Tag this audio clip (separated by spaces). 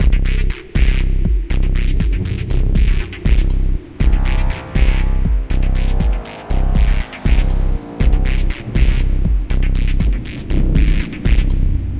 120-bpm bass battlefield beat drum loading loop percussion-loop war